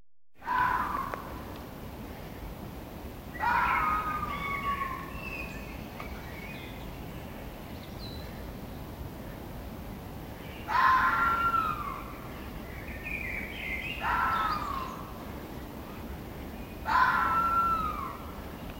Fox scream in the forest
A Fox screaming in the forest not far away from me, with birds in the background. Recorded on a smartphone in the spring of 2019.